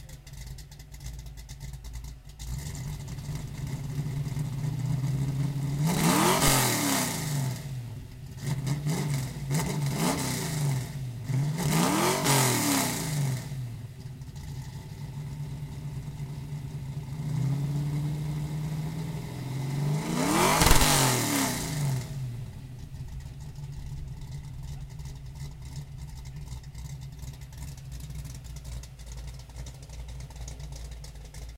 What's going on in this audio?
ford mustang v8 rear 4
engine, motor